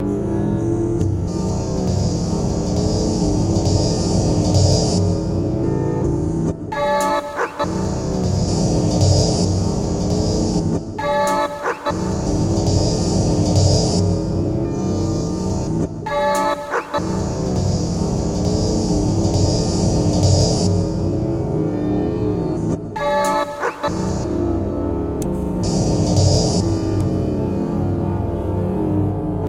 Sounds that have been changed and mixed.